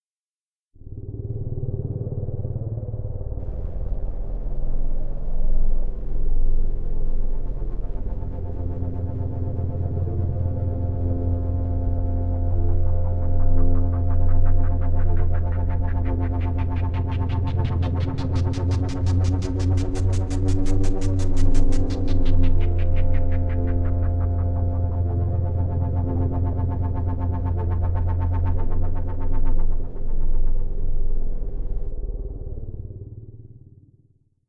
a moment of nightmare.
something terrible is going to happen.
designed with 3 synthesizers, delay & autofilter... synths used: Bassstation, Model-E, FM Four.